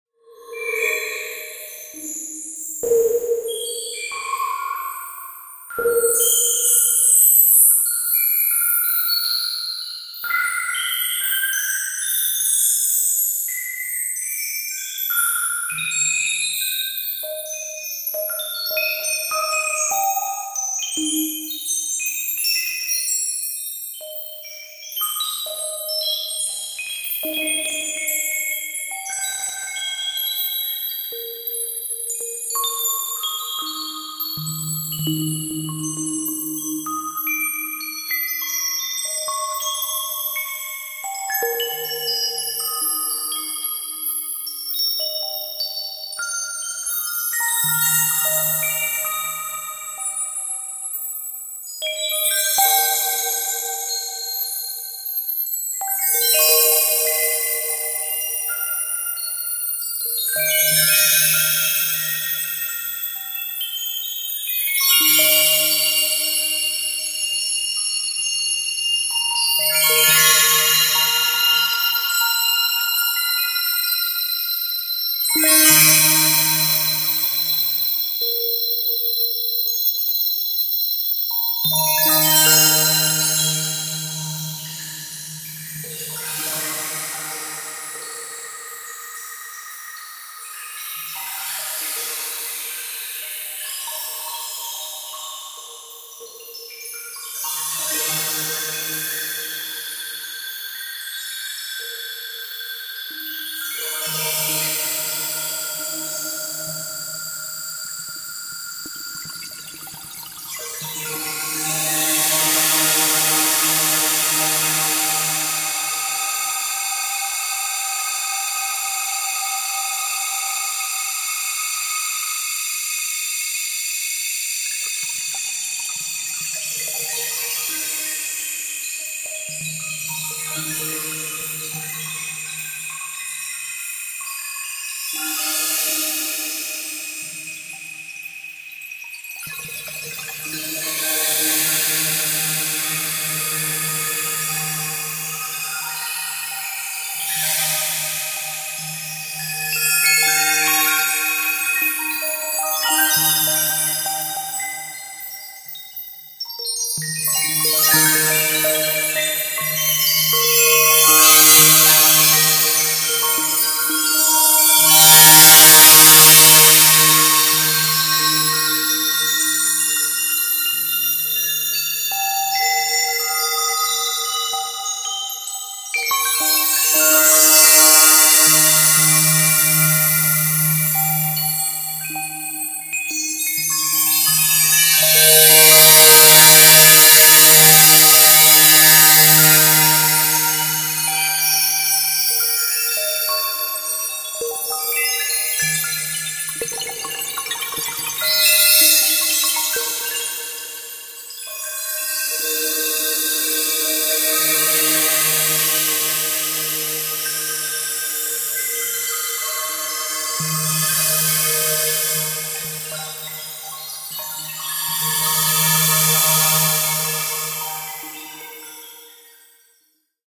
Drone dripples filtered through space station strainer.
ambiance, artificial, drip, ambient, drop, space, effect, experiment, drone, sci-fi, arpeggio, synth, soundscape, sound-effect, atmosphere, pluck, sfx, ring, arpeggiate, experimental, stretch, spacey, chime, electronic, ambience